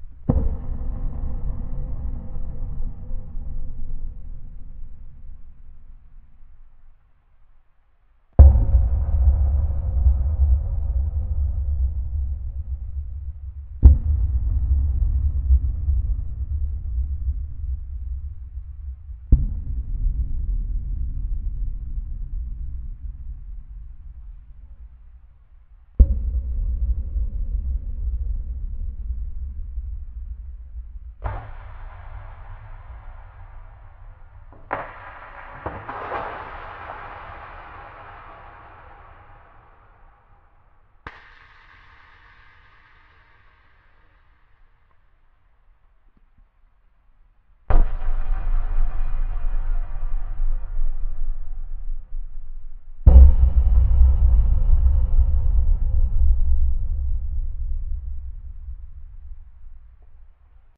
Thumps, Clangs and Booms - in space!
A series of different thumps, clangs and booms with a huge reverb. These are good for big, spacial scenes, contrasty cuts, dark themes, horror / suspense.
Live recording processed in Logic.
This is because it has been used (in its entirety!) on the ending of the song "Aliena" by a Italian band called Bioscrape. While that is totally cool and I'm kinda honored, I didn't have in mind that this would render all use of any part of the sound effect in all future and - I guess - all previous audiovisual productions illegal, both by myself and everyone else.
I guess that's the price you pay for giving things away for free.
so let's see. If it doesn't work, I will upload a modified version of this sound that hopefully will trick Content ID.
big, boom, clang, compact, concrete, creepy, dark, dense, echo, enormous, evil, gigantic, hollow, huge, impact, long, metal, metallic, open, space, struck, thick, thump, unearthly